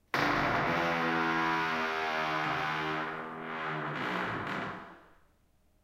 Heavy Door Creaking 01
Heavy door groan and creaking in reverberant space. Processed with iZotope RX7.)
Door, Groan